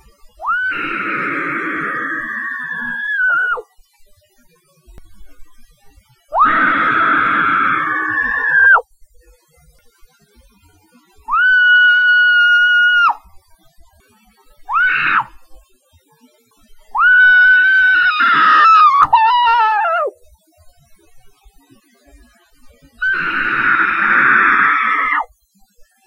screaming into a pillow. I didn't want anyone to think I was actually in trouble XD
woman, scream